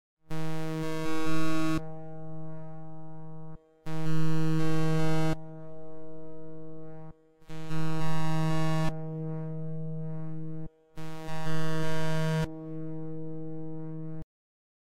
gross glitch
A glitch sound effect made by running random audio through the Gross Beat plugin in FL studio, configured to rewind and fast forward extremely fast and repeatedly.
broken, effect, error, feedback, flstudio, glitch, grossbeat